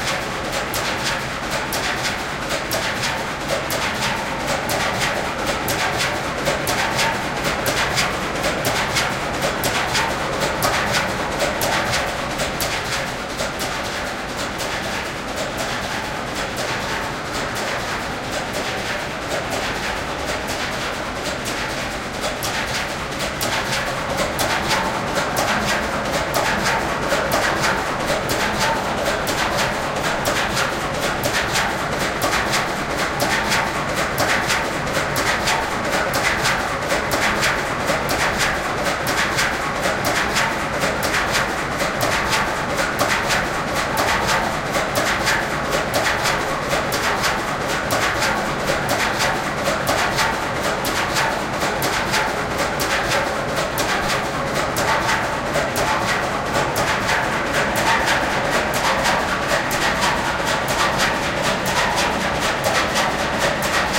Petunia engine 1
Recording made in the engine room of a DFDS Seaways containership while the motor was starting um for departure.